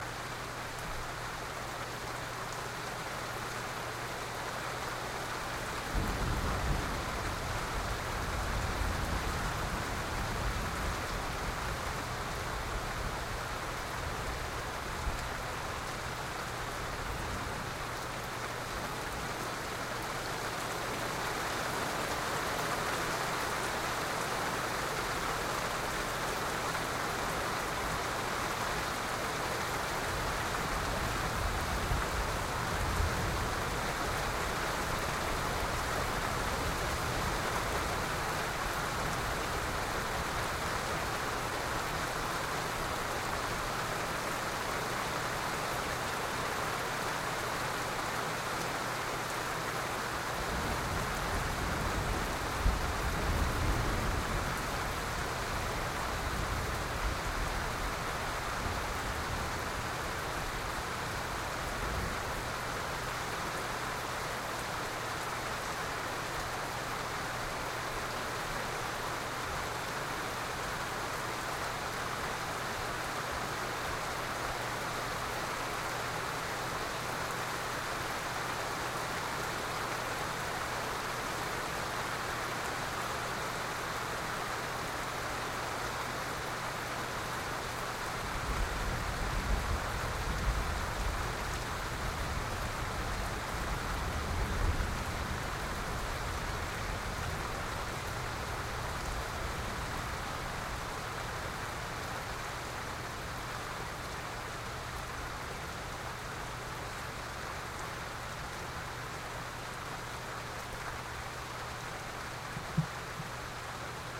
Rain with distant storms
Rain with a distant storm approaching or leaving.
Rain, ambiance, fall, water, storm, thunder